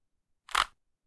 Rubik Cube Turn - 15
Rubik cube being turned
board, click, cube, game, magic, plastic, puzzle, rubik, rubix